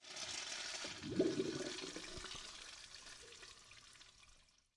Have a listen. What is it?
toilet flushing in bathroom at a school